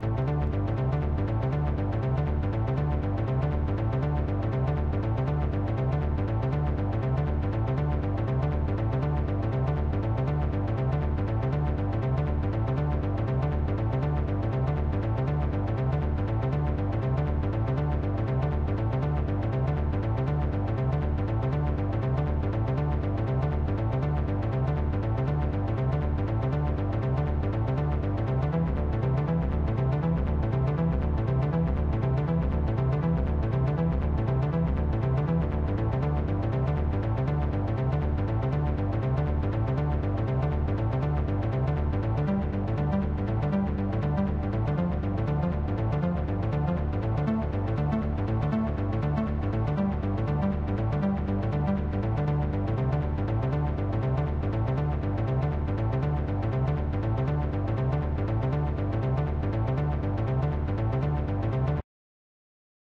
Bass Loop Hollow Sun
Simple creative Floydesque Bassline done with NI Kontakt and TAL Dub II...chop at will